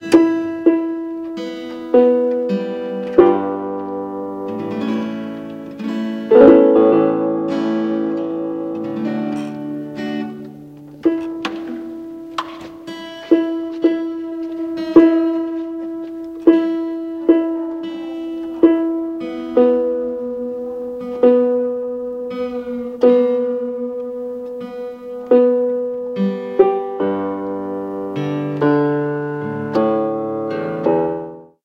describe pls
guitar-tuning
tuning guitar by piano
guitar, piano, tuning